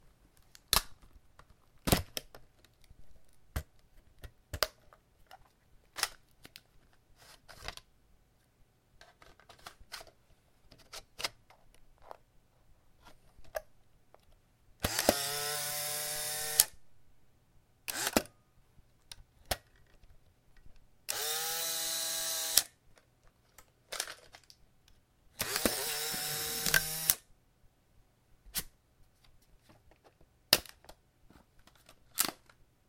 SX-70 Polaroid Land Camera Operation
The sound of me clicking the shutter button and taking a photo with my Polaroid SX-70 film camera. This specific model was purchased in 1975, and has all original parts.
Recorded on a Zoom H1.
film-camera, land-camera, operation, use